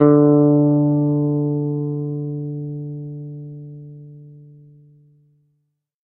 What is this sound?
Second octave note.
guitar, electric, multisample, bass